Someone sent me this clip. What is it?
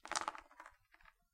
5 Dices thrown on a playboard